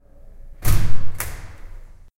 Open Inside Door
Metalic sound of opening the emergency door of 'Tallers'.